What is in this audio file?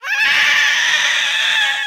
A soul wrecking high pitched voice sound effect useful for visages, such as banshees and ghosts, or dinosaurs to make your game truly terrifying. This sound is useful if you want to make your audience unable to sleep for several days.